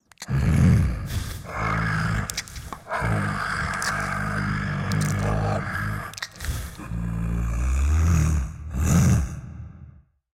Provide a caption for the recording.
One of the "Bull" sounds I used in one play in my theatre.